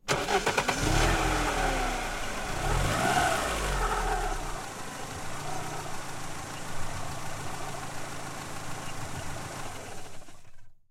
I recorded myself starting up my old 2001 Mustang that we rarely drive. Something I did every day and never thought about. I bought this car while still living in my old Church Hill neighborhood. I can still see it park out front of that old house....